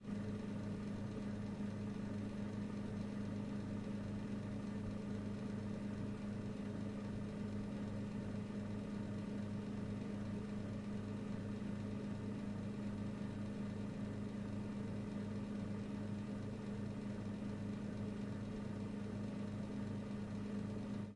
Coffee machine humming and buzzing.